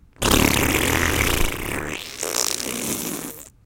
Juicy Fart2
Everybody has to try their hand at making fart noises. Recorded using a Blue Yeti Microphone through Audacity. No-post processing. As can probably be guessed, I made it using my mouth. This is a little more boisterous than the others.
fart, flatulence, liquidy, squish, squishy, thick, wet